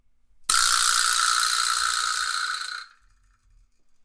Part of a pack of assorted world percussion sounds, for use in sampling or perhaps sound design punctuations for an animation
animation, hit, hits, percussion, sfx, silly, world